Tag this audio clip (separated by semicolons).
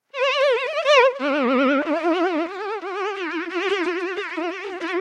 ambience; artificial; aspma-14-results; audio-signal-processing; digital; hps-transformation; mosquito; sms-tools; soundeffect; stochastic